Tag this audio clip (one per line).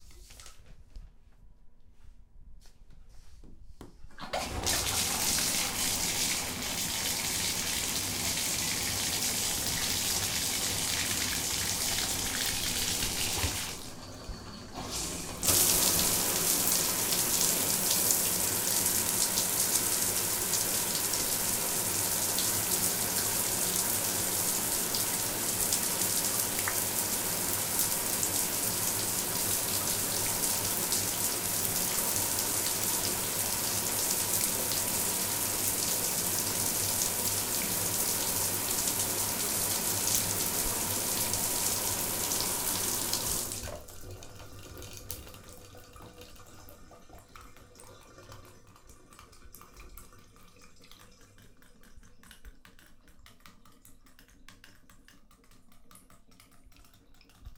sink
bath
bathroom
drip